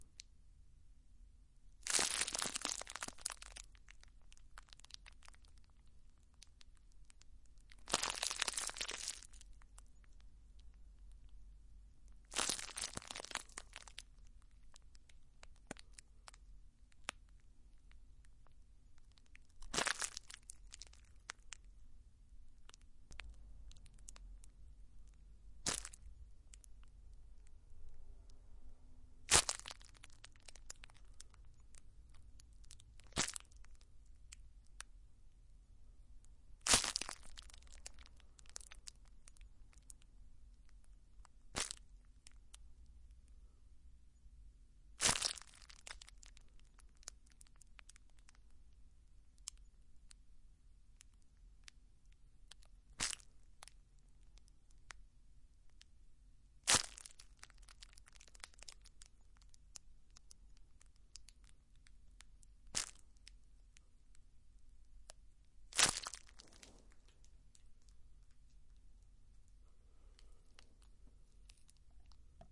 Packing Tape Crunch
Percussive scrunching of packing tape. Stereo Tascam DR-05
crinkle, packing-tape